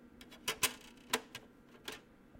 Vkládání CD
Putting CD inside the PC-ROM
CD, CD-ROM, PC, sounds